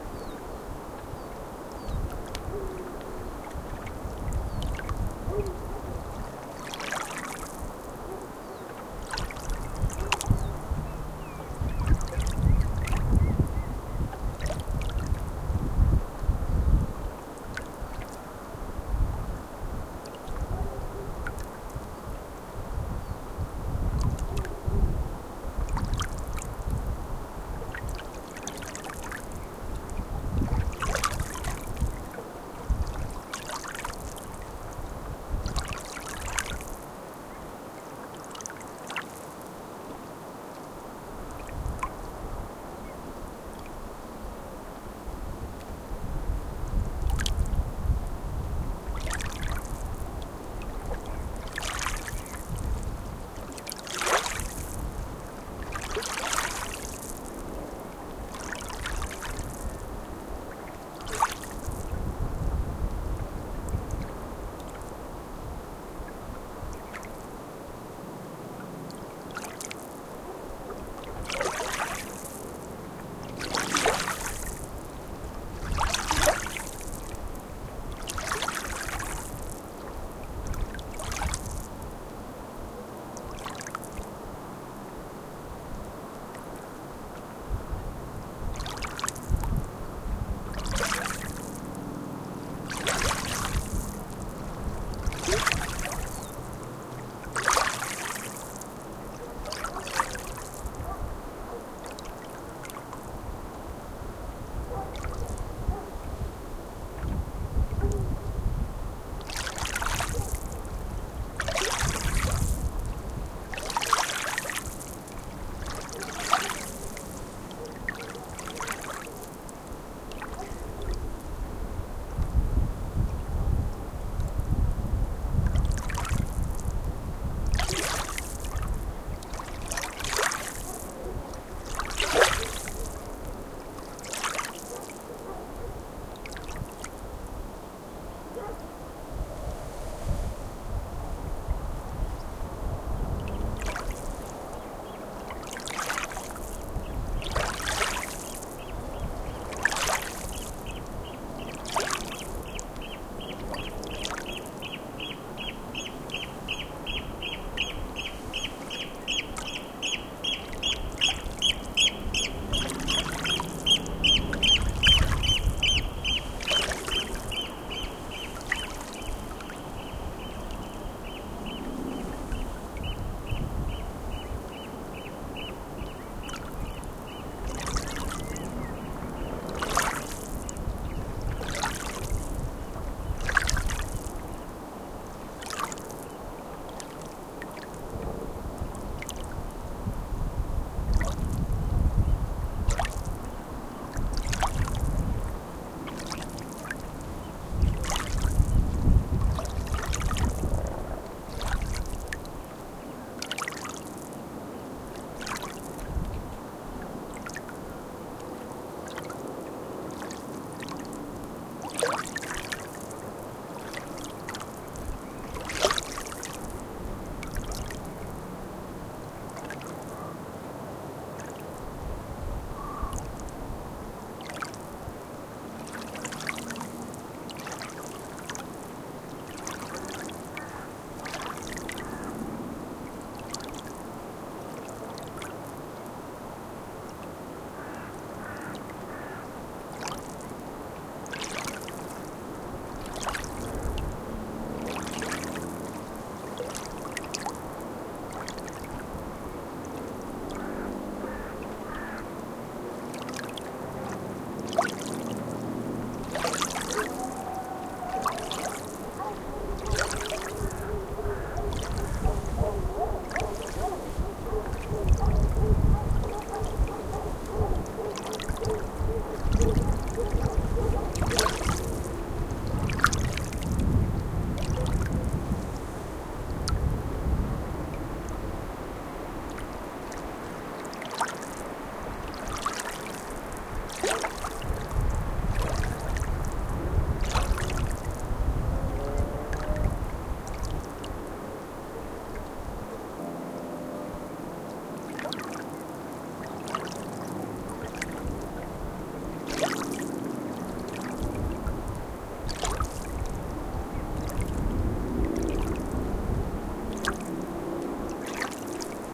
Sound of water in a quiet marina. Some seagulls, crows and other birds singing, and dogs barking in a distance. Recorded with Olympus LS-10.
birds, dogs, wind, water, marina, field-recording